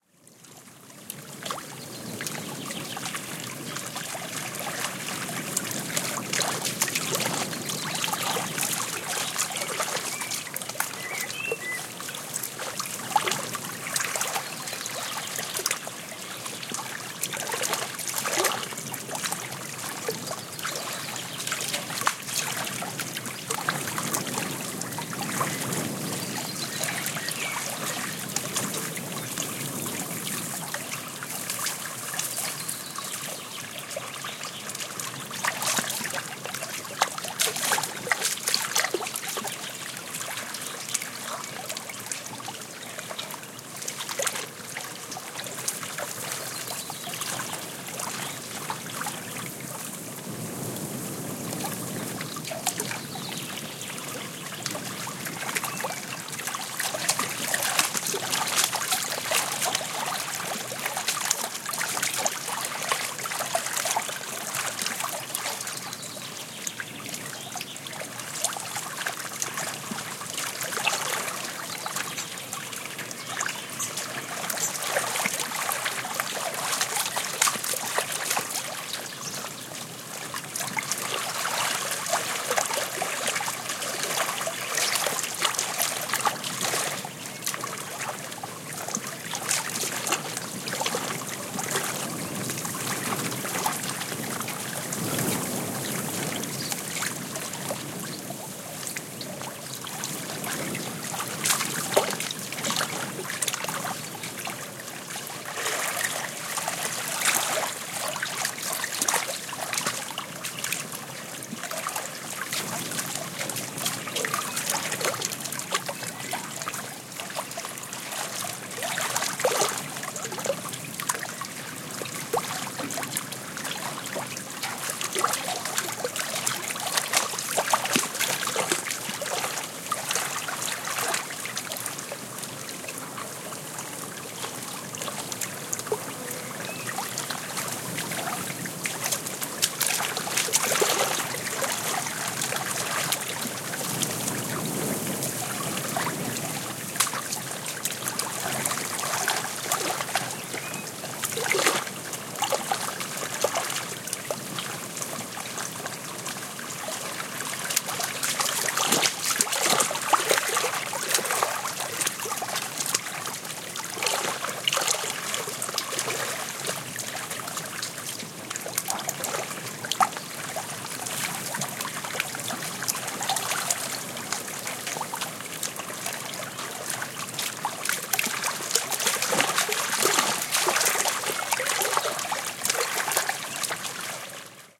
20190507.river.wind.65
Wawelets and wind, with birds singing in background. EM172 Matched Stereo Pair (Clippy XLR, by FEL Communications Ltd) into Sound Devices Mixpre-3. Recorded near Fuente de la Geregosa (Santiago de Alcantara, Caceres Province, Extremadura, Spain)